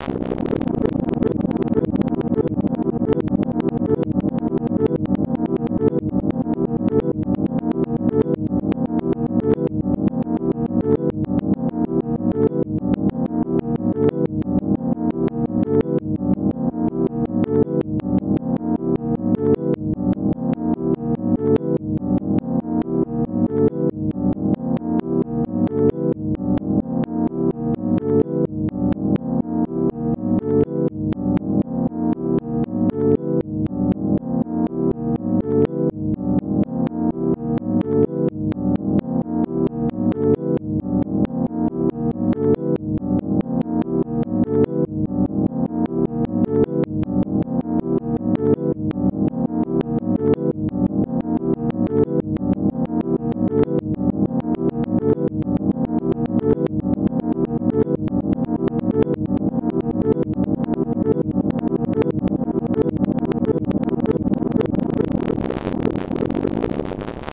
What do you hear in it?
rhodes chord fades
I recorded some chords on my Fender Rhodes into Logic and faded them. Then I took the different fade files and put them in order from short to long and back.